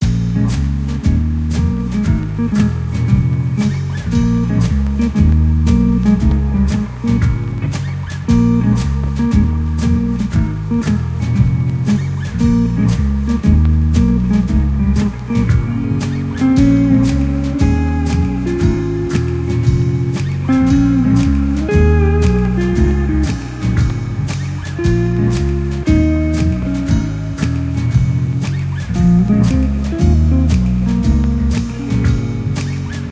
Jazzy Vibes #36 - Loop - Smooth Jazz
Rhythm-Guitar, Music, Melody, Mood, Drums, Loop, Double-Bass, Background, Jazz-Band, Guitar, Jazzy, Solo, Jazz-Bass, Band